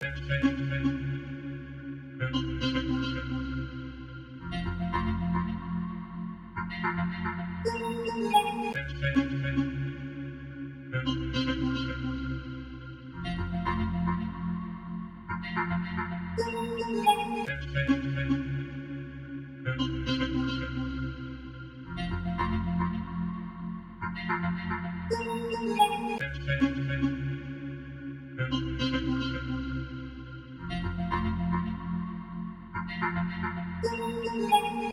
Pad Arp Trap, 110 BPM